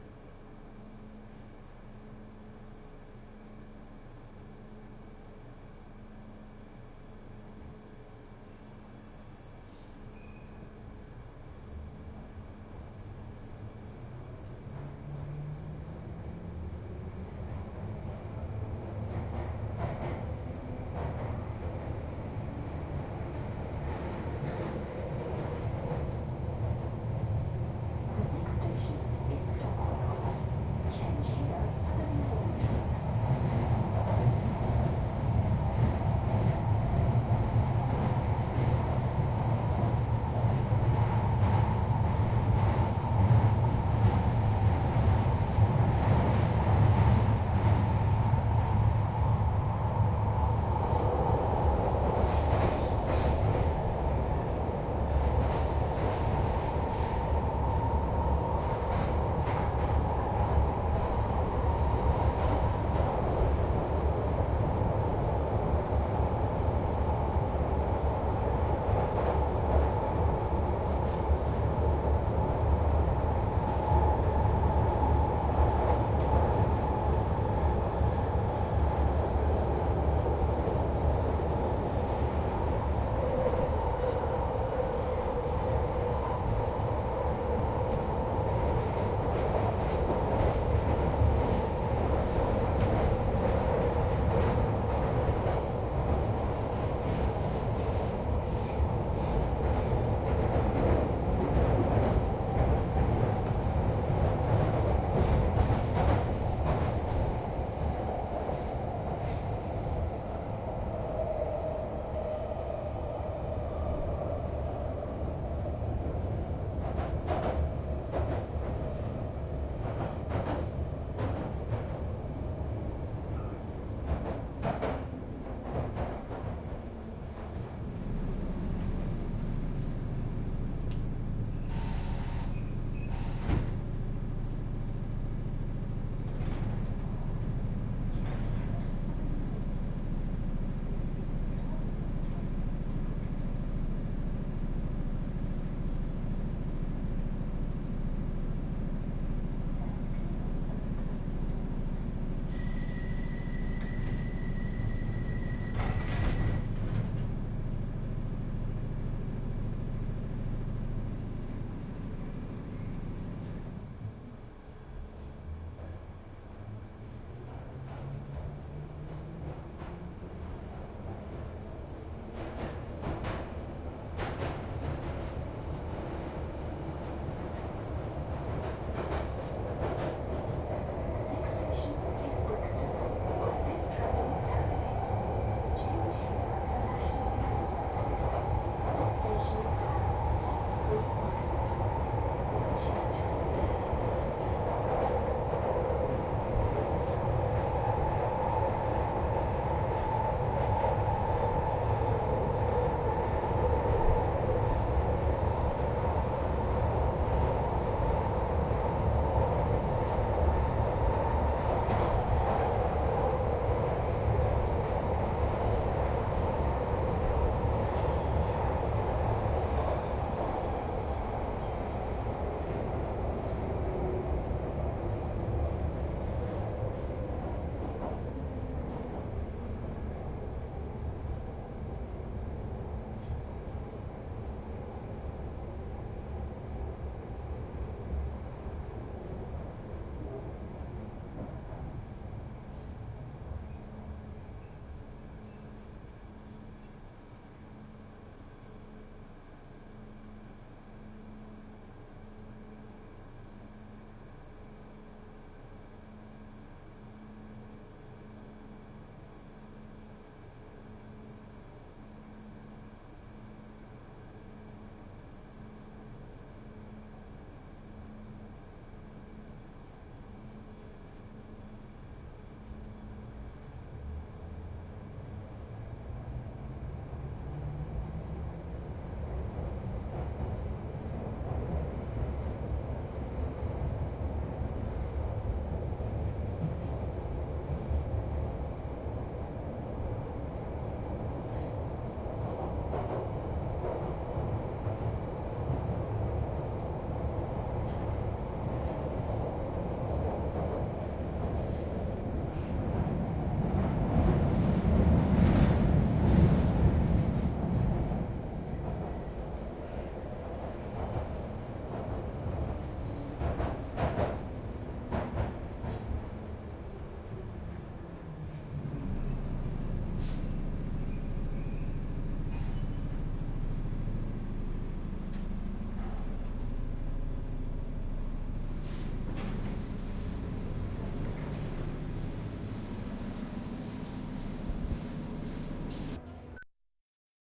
Travelling on the London Underground, Victoria Line,continues from where the last sample in this going to Brixton pack left off.